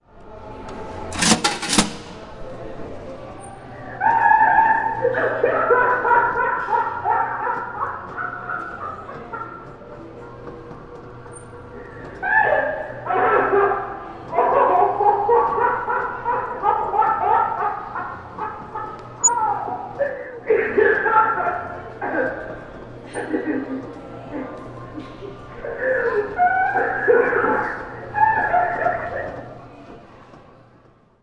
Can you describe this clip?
MM Project - 1 Laffing Sal
Musée Mécanique recording project - 1 Laffing Sal
"Laffing Sal is one of several automated characters that were built primarily to attract carnival and amusement park patrons to funhouses and dark rides throughout the United States. Its movements were accompanied by a raucous laugh that sometimes frightened small children and annoyed adults. Laffing Sal (sometimes referred to as "Laughing Sal") was produced by the Philadelphia Toboggan Company (PTC) of Germantown, Pennsylvania during the 1920s and early 1930s. As one of the first animated amusement figures, Laffing Sal is considered a forerunner of the many animatronic figures seen at attractions around the world, including the Audio-Animatronic figures at Disney themeparks."
San-Francisco, Laffing-Sal, humor, amusement, funny, game, coin-operated, arcade, machine, laugh, puppet, automaton, voice, scary, mechanical, field-recording, old-time, animatronic, games, play, vintage, museum, robot, attraction, old, Mecanique, Musee, laughing, historic